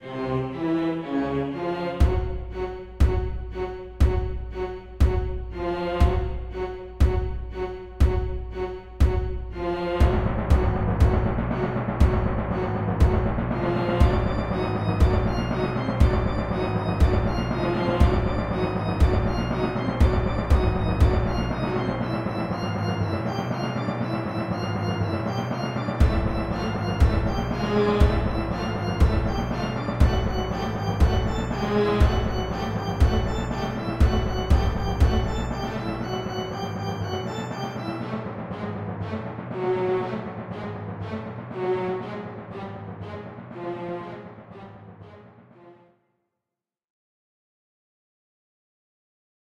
The Corrupted Gates
Short but high-intensity boss battle music
[Please note that I have no previous musical experience and have created these for a project for university]